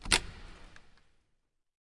halldoor open
Opening a large hall door from the outside
door, exterior, large, open, reverb